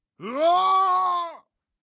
116 reus schrikt
Shout.
Recorded for some short movies.
sfx, effects, shock, shout, scream, sound-design, foley, sounddesign, screaming, cartoony, short, strange, man, gamesound, vocal